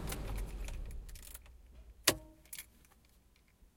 car turning off keys out
Car engine turning off and keys being taken out.
car, engine, keys